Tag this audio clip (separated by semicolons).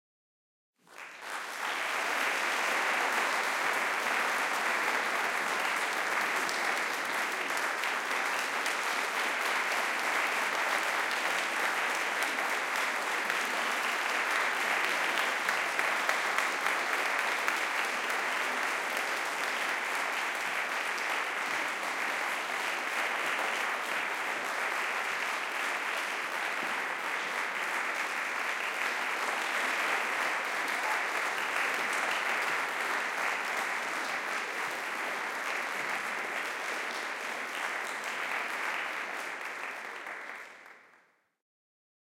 applauding; cheering; clapping